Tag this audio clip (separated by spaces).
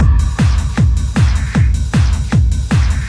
dance loop percussion beats drums hard